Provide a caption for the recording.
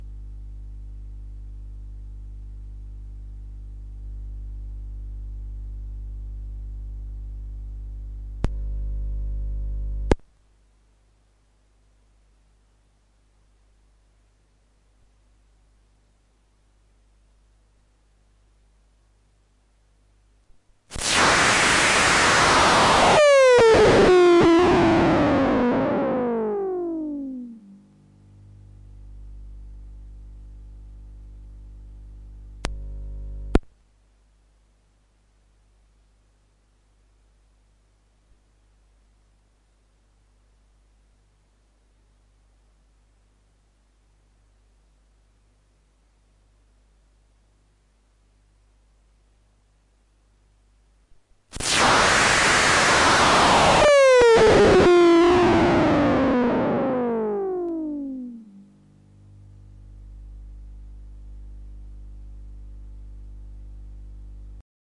Strange T.V. sound
the sound my small t.v. makes when it one turns it off.